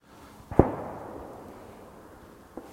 Distant shotgun shot with reverb.